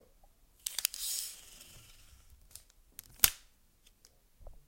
Scotch tape
Measuring out a piece of tape and tearing it off the roll
rip, scotch, tape